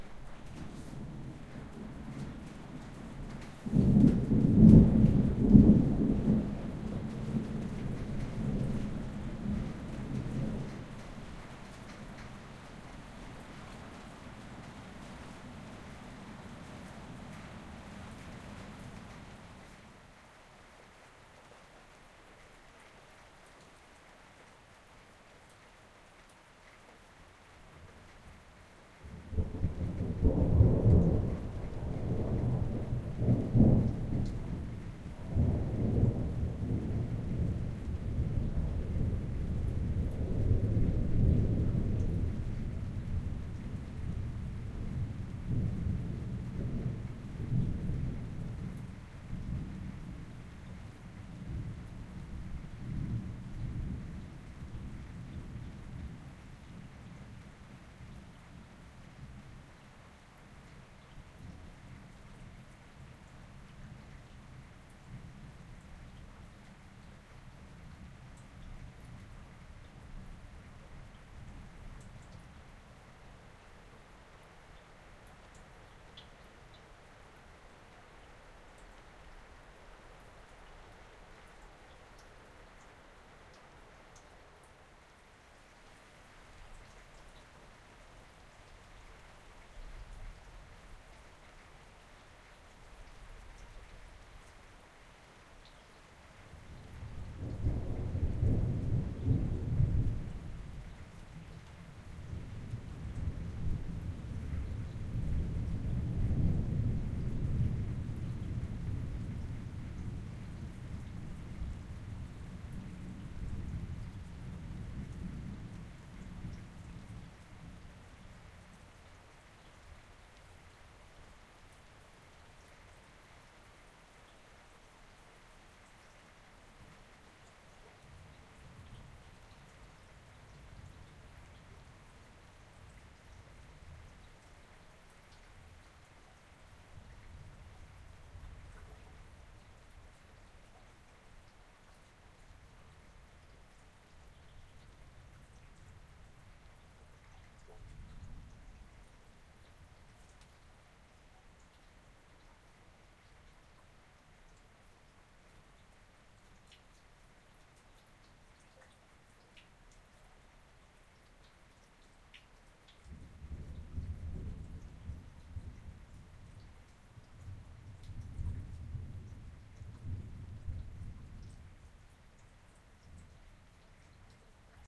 Some distant thunders, some gentle rain, it woke me up, so I took revenge by capturing its sound and locking it away in a digital file. Recording chain" Rode NT4 (stereo mic) - Edirol R44 (digital recorder), easiest thing to set up in a sleep-deprived state :)